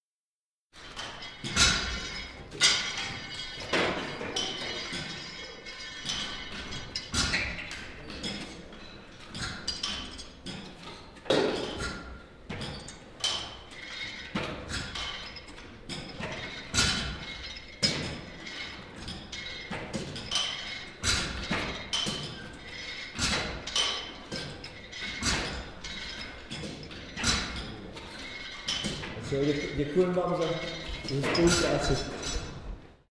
Fitness room Posilovana AMB
Ambience of the fitness centre.
gym, fitness, centre, amb